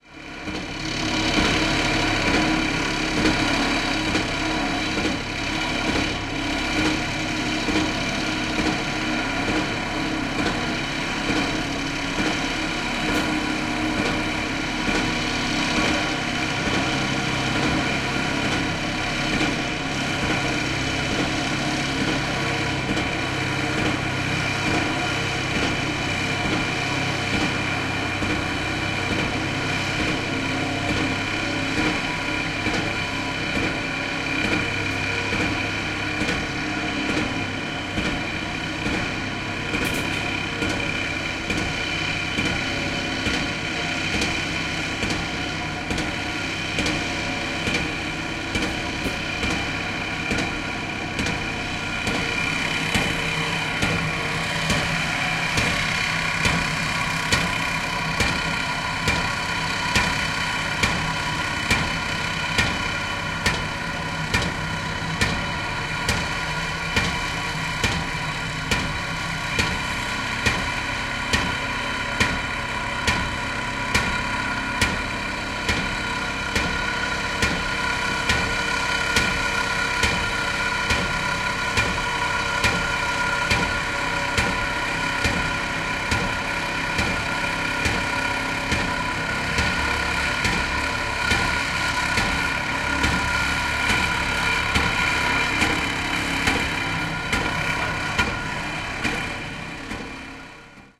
110817- piledriver and machine
17.08.2011: eighteenth day of ethnographic research about truck drivers culture. Renders in Denmark. The river port in the center of Renders. Unbelievable noisy and beautiful sound of piledrivers and drill. During the unload some steel staff.